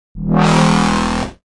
Dub Wah E3 140bpm
A wahwah saw made in Sytrus (FL Studio). 140bpm in E3. Left raw and unmastered for your mastering pleasure.
E, E3, FL, dirty, dub, dub-step, dubstep, effect, electro, electronic, fx, rough, saw, studio, synth, synthesizer, sytrus, techno, wah, wah-wah, wahwah, warble